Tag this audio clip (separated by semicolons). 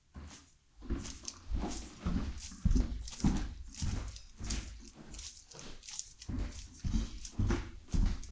floor; Footsteps; steps; Wood